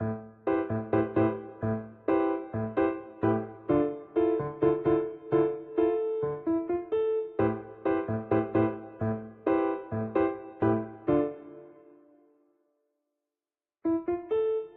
piano loop 25
A piano loop